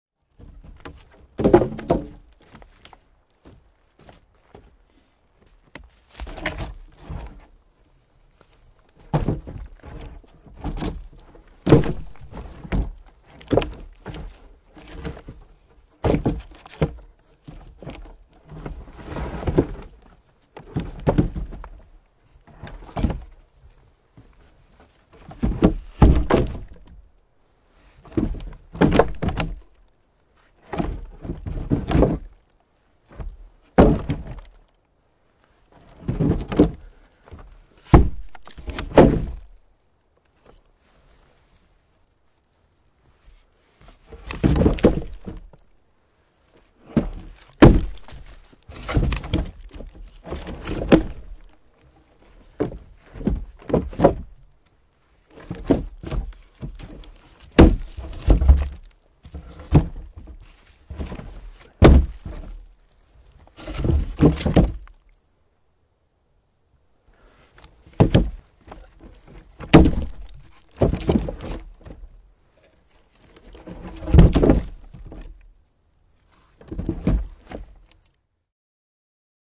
Tukinuitto, uitto / Log driving , men pulling down, dismantling a logjam with boathooks, logs clatter, a close sound
Tukkisumaa puretaan keksillä, tukkien kolahduksia ja kolinaa. Lähiääni.
Paikka/Place: Suomi / Finland / Lohja, Retlahti
Aika/Date: 10.11.1997
Clatter, Dismantle, Field-Recording, Finland, Keksi, Log, Log-Driving, Log-floating, Logjam, Tukinuitto, Tukit